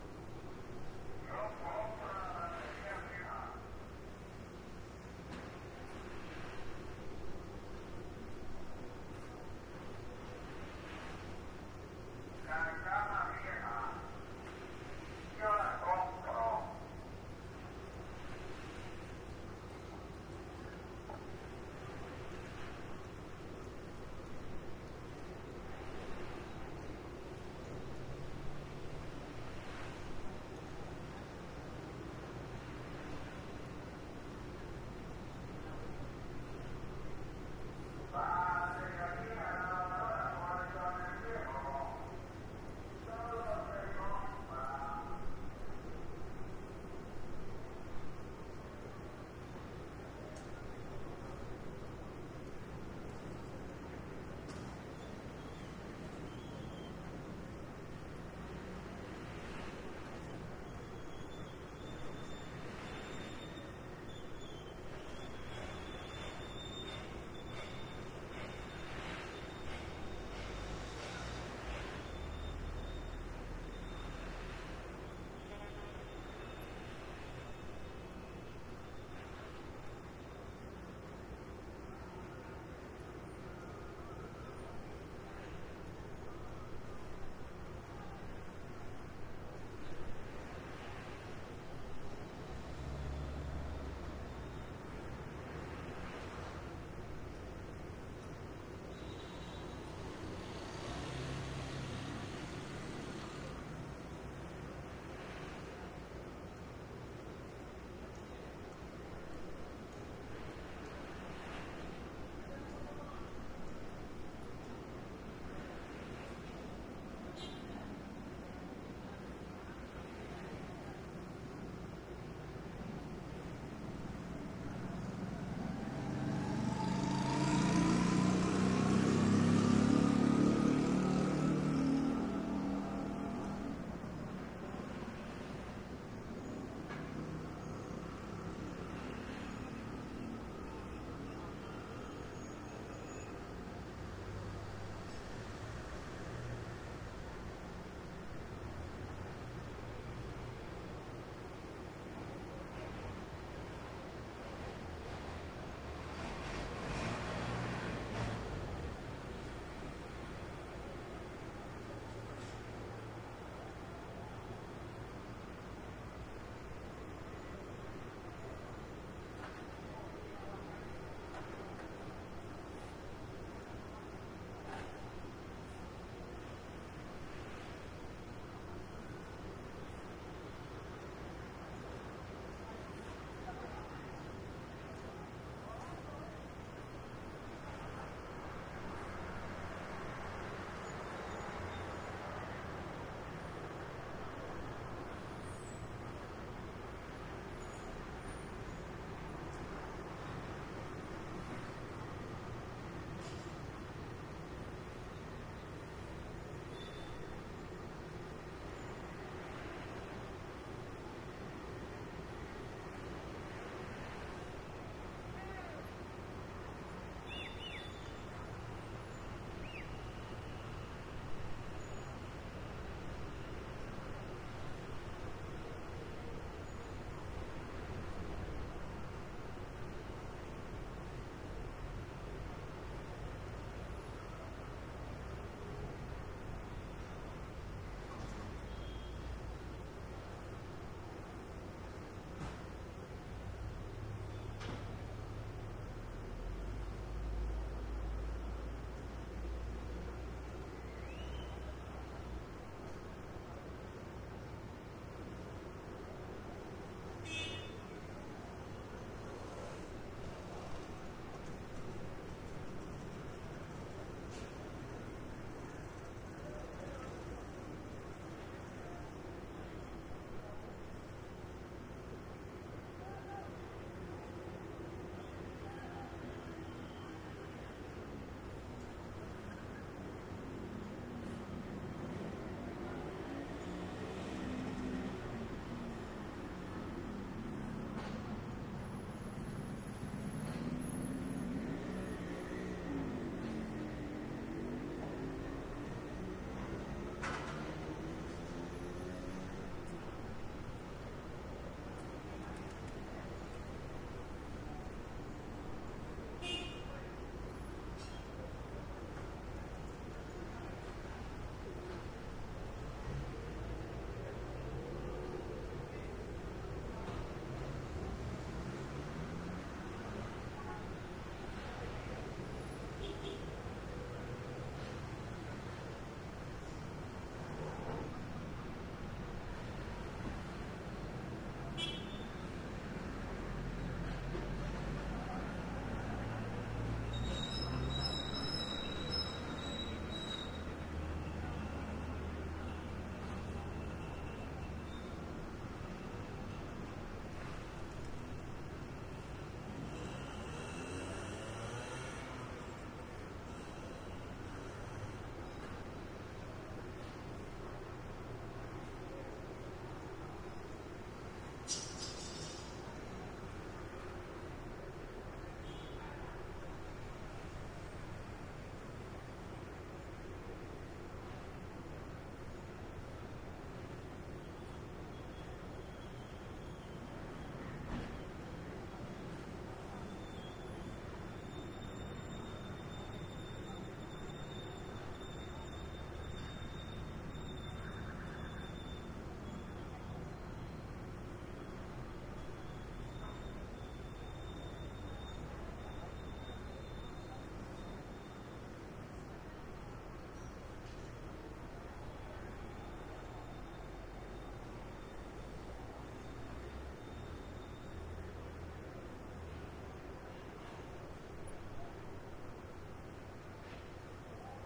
Santo Domingo-May 15-balcony-2
Soundscape recording on an apartment balcony above a street in the Colonial Zone, in Santo Domingo in the Dominican Republic. May 15, 2009.
balcony, busy, colonial, domingo, dominican, republic, santo, street, zona, zone